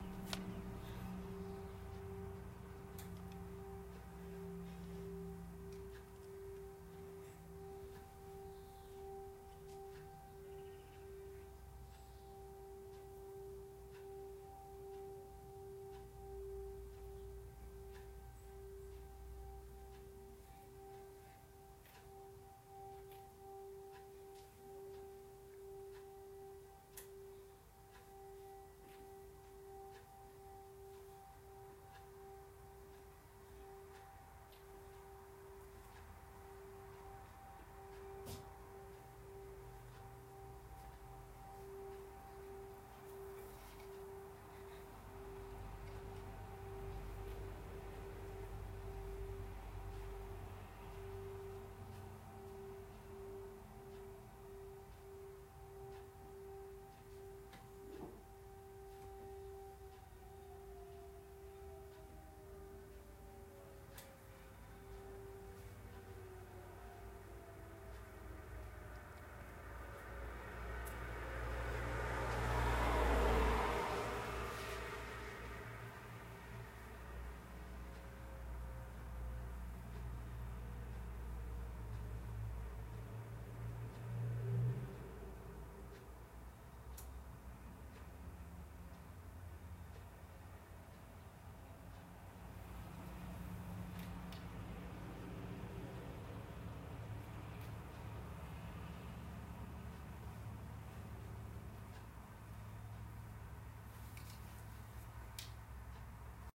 CIT Siren 4-8-21
CIT Siren Located Really Far Away In 3 Miles Away